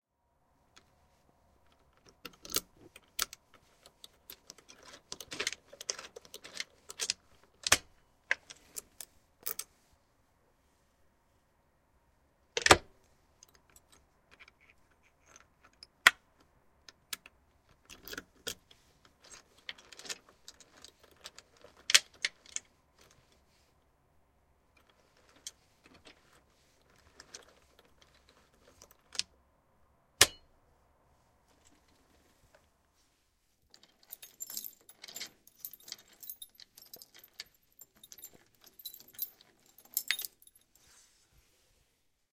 unlocking the safety frontdoor with keys needs more than one turn. then closing and locking it again. the second unlock has a pause before the mechanism finally unlocks the door. at the end the door is getting locked from the inside with keys